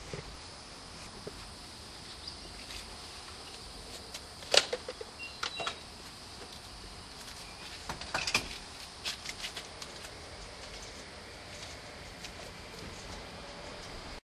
southcarolina exit102santee windlesspump
In car perspective with less wind of the gas pump next to Santee Resort Inn recorded with DS-40 and edited in Wavosaur.